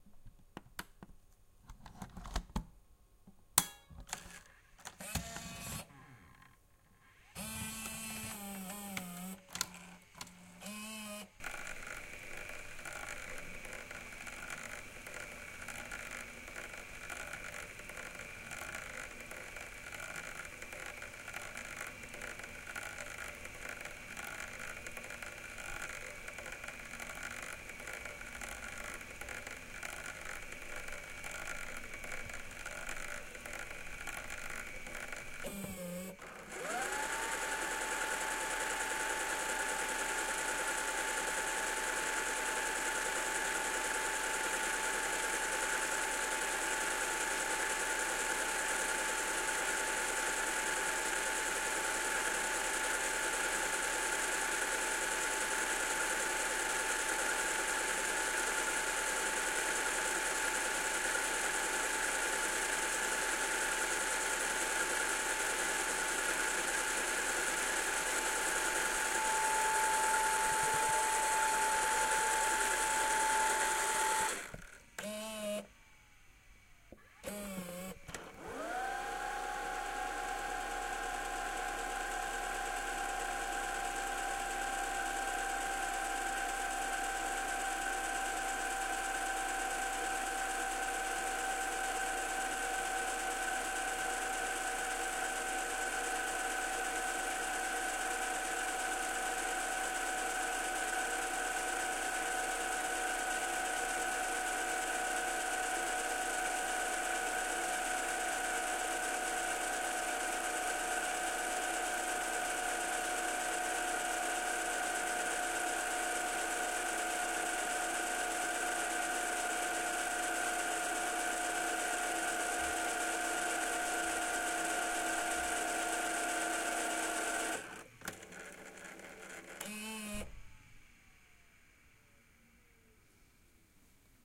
The sound of a working Sharp Viewcam VL-E760U Video8 Camcorder.
Recorded with Zoom H6.
8mm, 90s, button, cassette, click, digital, DV, eject, electric, fast, forward, heads, Hi8, loading, machine, mechanical, minidv, noise, pause, player, recorder, rewind, sony, stop, tape, television, TV, VCR, Video8